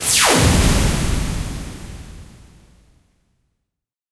Sounds like a laser going off, then a big rumbling explosion. Created in Adobe Audition using the generate tones to create a saw wave sweeping from 22kHz to 20 Hz, then reverbed and Eq'd.
laser, rocket, reverb, war, alien, rumble, synthetic, explosion, bomb, wide, hit, futuristic, future, stereo